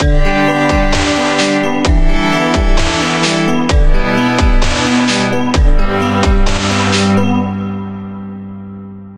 Sad Loop #1
A sad loop made in FL Studio. Sound inspired by Temple of the Dog's song "Hunger Strike".
version, temple-of-the-dog, beat, synth, loop, 90s, electronic, music, loops, arp, hunger-strike, relax, string, keys, arpeggio, violin, drums, hope, sad, sustain, sadness, chorus, song, hit, improvised